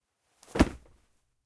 Body falling to floor 7
A body falling heavily to a carpeted floor, can also be used for hard outdoor ground.
body, collapse, collapses, collapsing, drop, dropping, fall, falling, falls, floor, ground, hit, impact